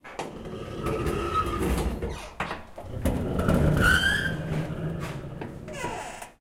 Old metal Door, recorded with Zoom H4N
close, Metal, Old, open, Door